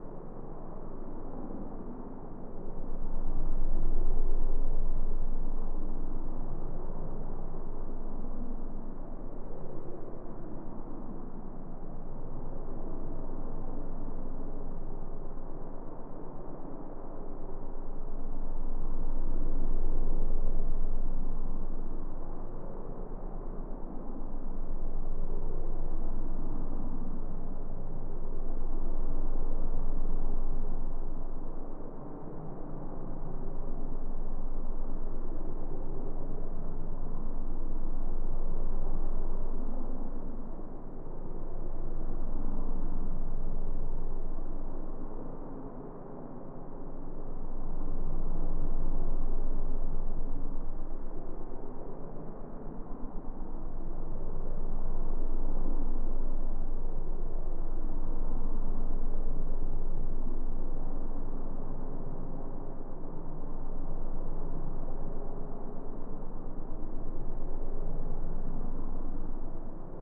An ambient sound from the Sokobanned project.